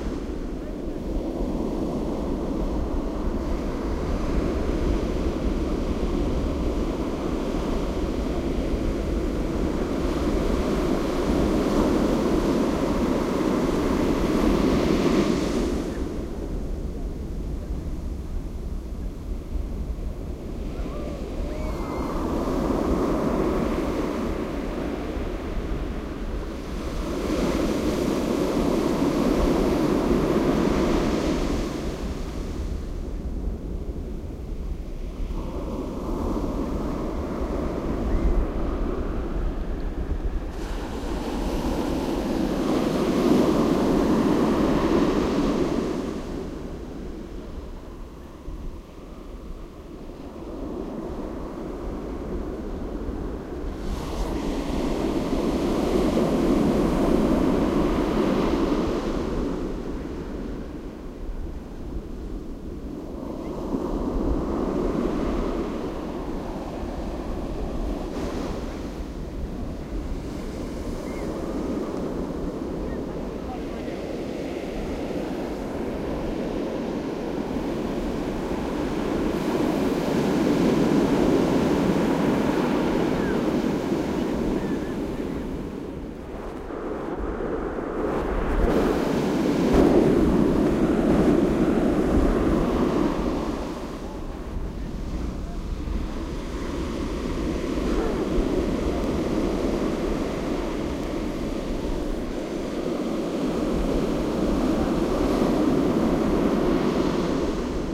Venice Beach, California. The Pacific Ocean shortly before sundown. This sound can seamlessly loop. See my other EndlessOcean file for waves recorded slightly closer and more direct